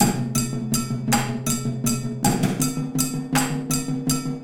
Mod Clod Drum Loop
6/8 drum loop, not quantized. Played on found objects and floor toms.